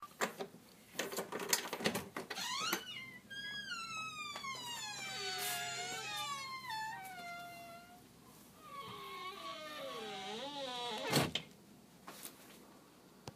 Door Squeak 2
This is a door creaking
creak, door, squeak